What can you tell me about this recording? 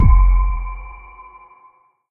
Kicks With Effects
Percussion created by layering various drum sounds together and applying a few effects in FruityLoops, Audacity and/or CoolEdit. Layered Kick and toms, over a metallic sounding effect.
Ambient, Roomy, Drum, Kick, Tom, Processed, Metallic, Layered, Percussion